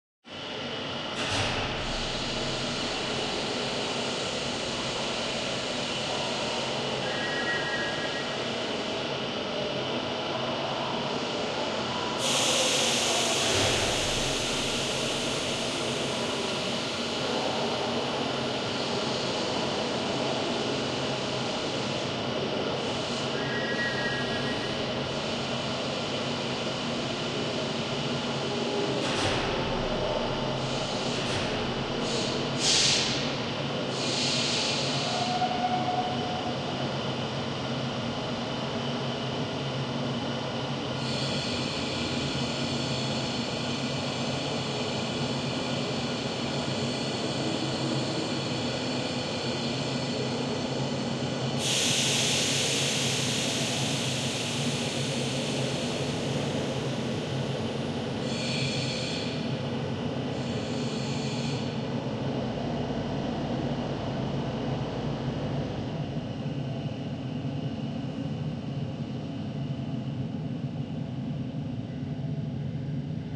Stream Train Station Noises
I needed a background sound for a play I was involved in to suggest a busy train station in the 1930's. There are various steam sounds, door clucks, whistles and a lot of reverberation sound effect applied.
Steam, Railway, Locomotive, Train, Platform, Ambiance, Station, Whistle